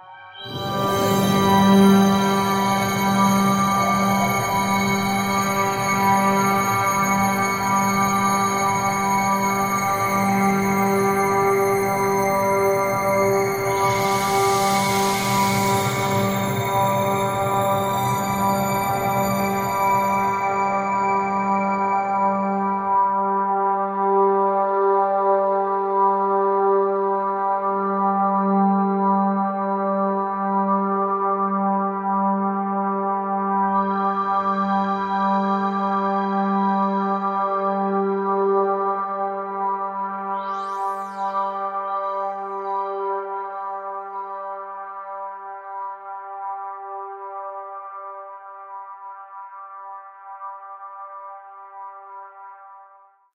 LAYERS 007 - Overtone Forest - G4
LAYERS 007 - Overtone Forest is an extensive multisample package containing 97 samples covering C0 till C8. The key name is included in the sample name. The sound of Overtone Forest is already in the name: an ambient drone pad with some interesting overtones and harmonies that can be played as a PAD sound in your favourite sampler. It was created using NI Kontakt 3 as well as some soft synths (Karma Synth, Discovey Pro, D'cota) within Cubase and a lot of convolution (Voxengo's Pristine Space is my favourite).